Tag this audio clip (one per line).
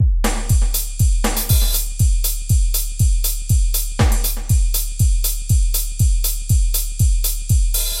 drum
loop
trip-hop
120-bpm
jazz